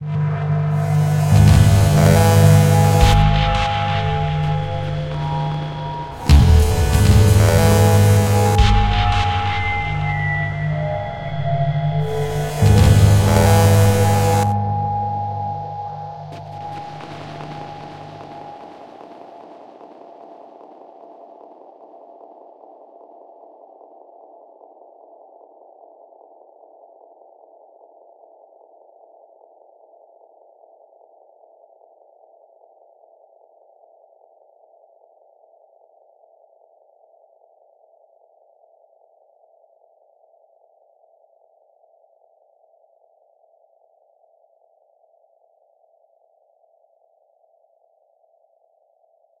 Cinematic noise, stretch , filters ,EQ,Modular, Twist and turn it around audio conecctions.
keyboard, synthie